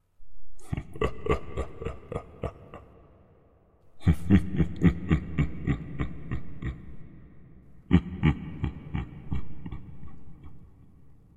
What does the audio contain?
Male Dark/Evil Chuckle
Couldn't find what I wanted on here so I made something. Small little chuckle detuned and heavy reverb. Not over the top, not cheesy. Just a simply horror themed chuckle. Credit would be nice. I would love to see where this gets used. But not necessary.